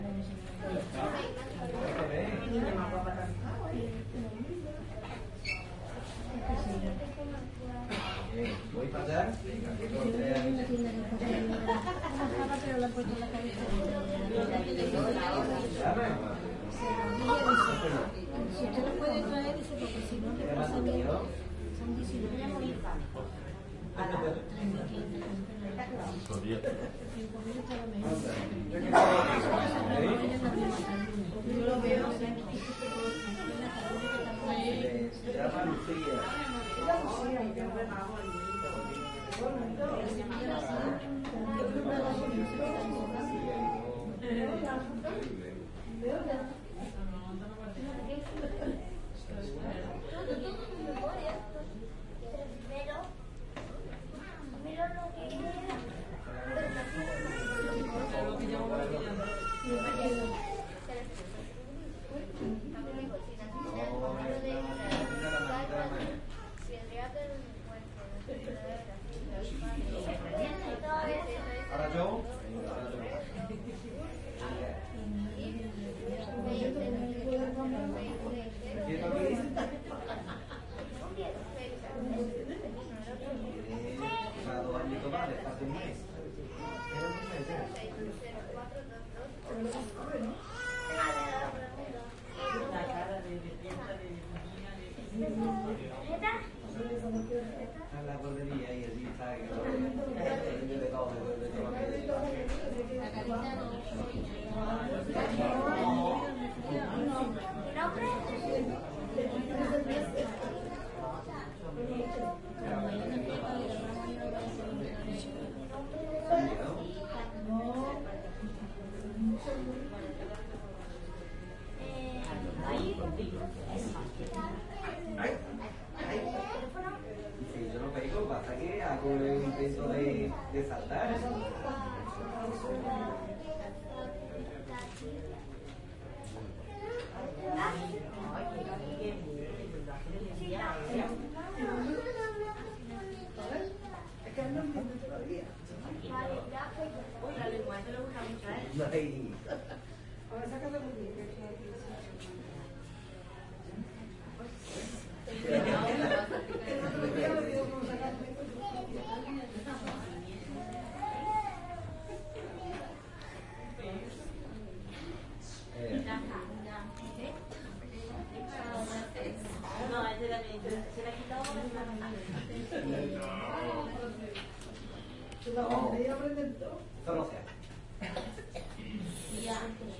20061205.hospital.waiting.room
ambiance in a hospital waiting room, with voices of adults and children. Soundman OKM into Sony MZN10 minidisc